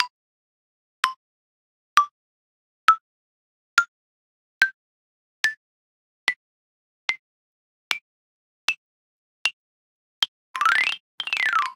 A collection of small wooden xylophone sounds (notes) recorded with AT2020 through Audient iD4.
Enjoy!
Ascend, Descend, note, Scale, Single, Sweep, Woodblock, Wooden, Xylophone